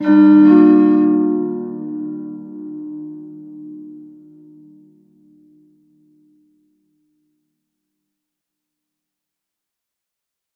pad short 001
Bell-like little pad.
short, melodic, futuristic, bell, pad, sweep